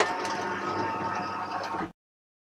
closing an elevator door sample
closing an elevator door really special. this is a good sample
elevator, Door